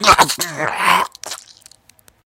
Male Death 3
a male death sound
scream, horror, dead